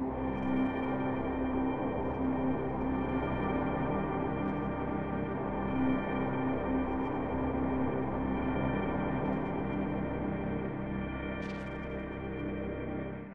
strangeportals90bmp
Vibrant pad and drones mixed in this ambient texture.Ambient texture. 90 bpm 4/4. Duration: 5 bars.
ambient, drone, synth, envirement, pad, textures